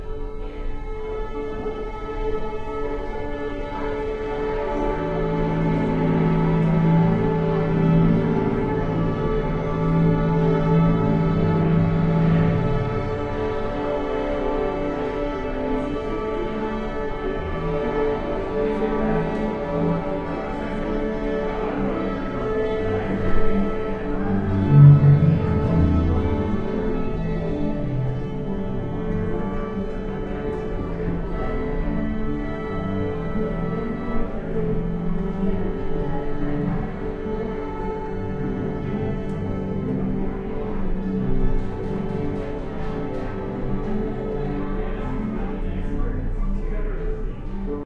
this is a clip of the local central Kentucky youth orchestra string section warm up in the performance hall at arts place in Lexington.